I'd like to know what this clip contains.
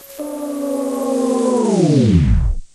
Rewind Creeps Varispeed 03c
retro, varispeed, tape-stop, rewind